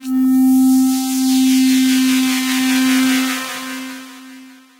Analog lead
From a Minimoog